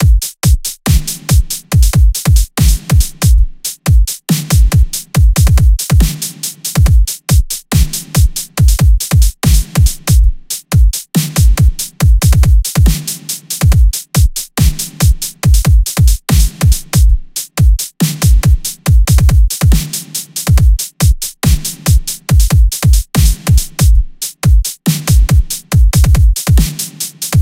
This beat loop was designed to work together with the others in this pack at 140 bpm. 1 beat loop and 2 synth loops. Give them a try if you are experimenting with sampling, or use them in a track.